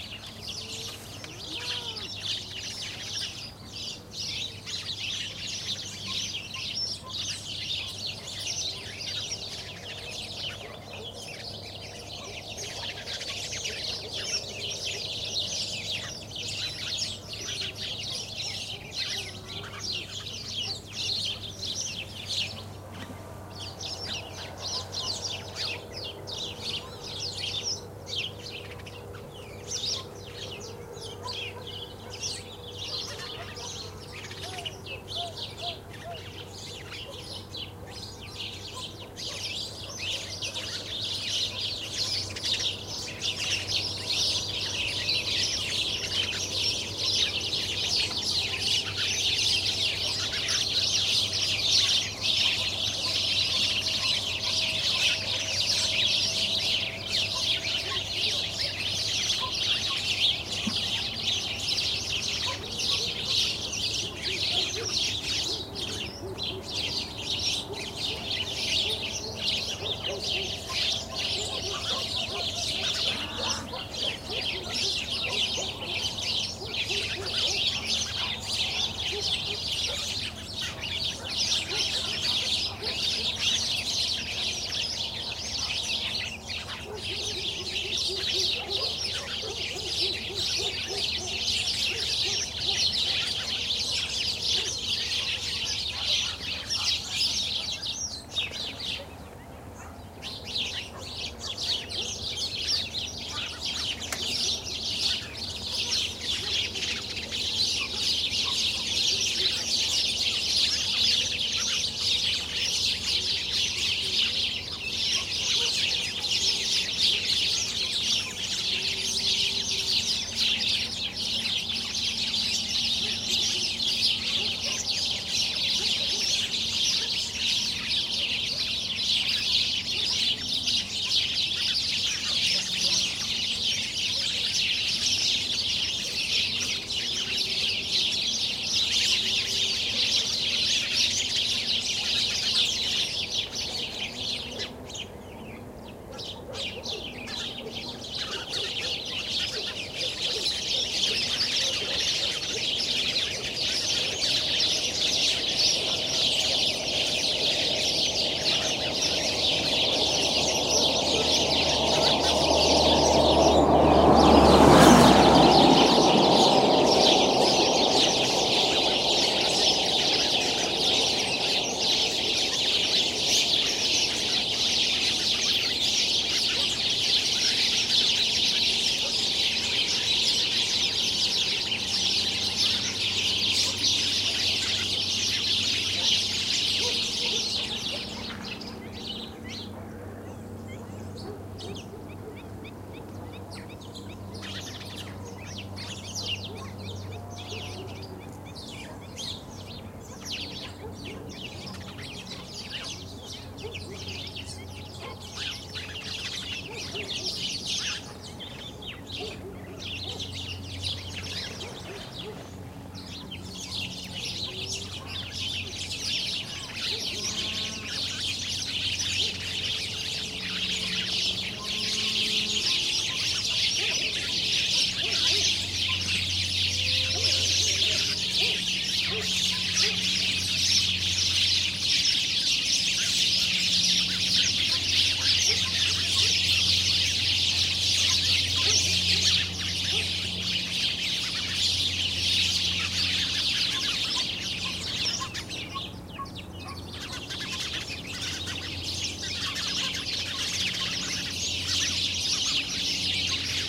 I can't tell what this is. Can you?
20061121.sparrows.marsh.02
marsh ambiance, with a large house sparrow group in foreground; cow moos, dog barks, and other distant noises. Sennheiser me66+AKG CK94-shure fp24-iRiver H120, decoded to mid-side stereo
nature, autumn, ambiance, marsh, moo, sparrows, birds